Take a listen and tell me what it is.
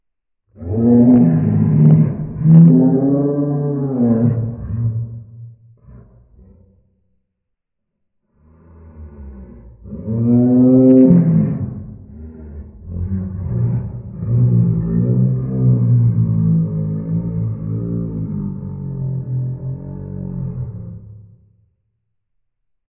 massive beast wailing howling screaming roaring blaring whalelike whale-like LOWEST PITCH
low-pitch, screaming, howling, beast, massive, roaring, wailing, whalelike, blaring, whale-like